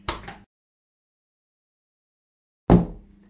This is a wooden door opening and closing.
bathroom, close, closing, door, doors, open, opening, wooden